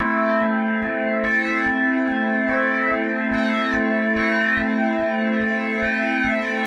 Actually, it's a little bit of a sound package. But sharing is always good. My drum bass sounds can be used in house, nu-disco and dance pop projects. Obviously when I was listening, I felt that these sound samples were a bit nostalgic. Especially like the audio samples from the bottom of pop music early in the 2000s. There are only drum bass sound samples. There are also pad and synth sound samples prepared with special electronic instruments. I started to load immediately because I was a hasty person. The audio samples are quite lacking right now. There are not many chord types. I will send an update to this sound package as soon as I can. Have fun beloved musicians :)